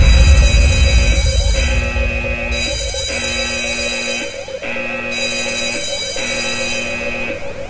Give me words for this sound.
J5 alarm sound
loud alarm sound outside a garage
house, sound